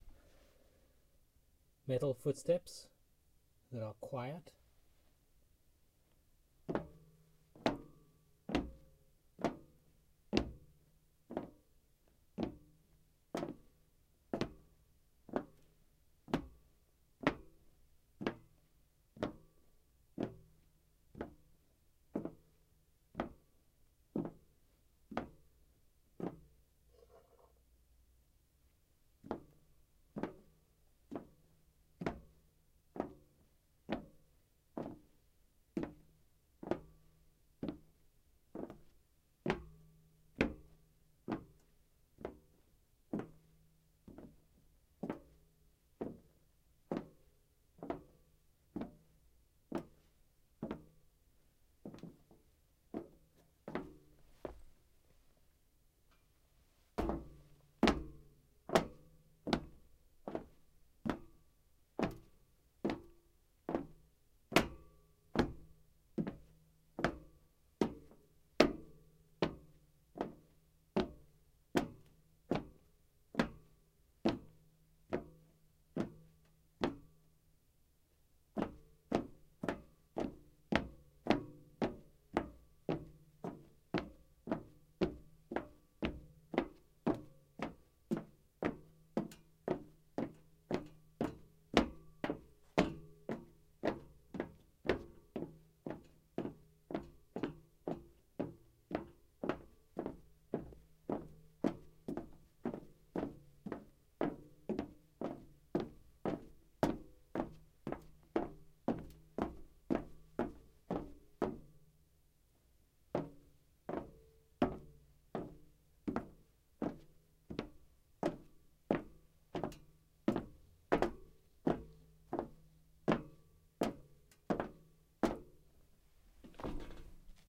footsteps boots metal 2
footsteps boots metal
metal, footsteps, boots